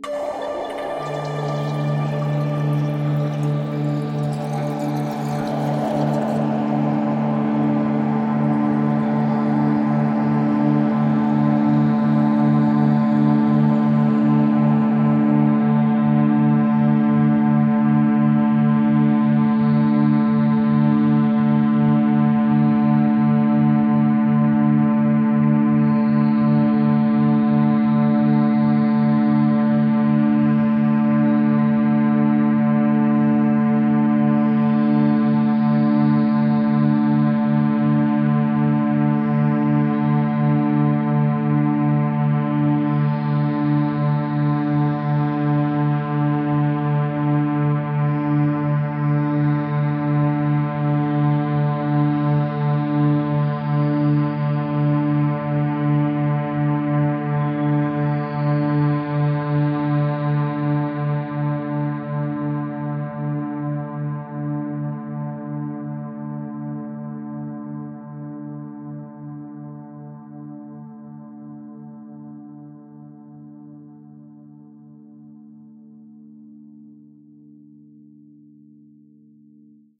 LAYERS 005 - Heavy Water Space Ambience is an extensive multisample package containing 97 samples covering C0 till C8. The key name is included in the sample name. The sound of Heavy Water Space Ambience is all in the name: an intergalactic watery space soundscape that can be played as a PAD sound in your favourite sampler. It was created using NI Kontakt 3 as well as some soft synths within Cubase and a lot of convolution (Voxengo's Pristine Space is my favourite) and other reverbs.